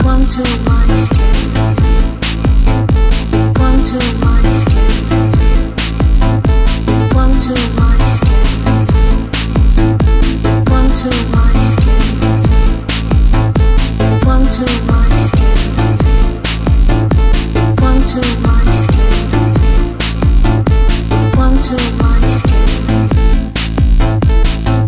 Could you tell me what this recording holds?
drumbase sequencing 6 and voice
drum and base loop whith a voice loop,electronic synth base
electronic, drum, synth, loop, electro, progression, techno, kickdrum, club, dance, melody, bass, base, trance, kick, hard, beat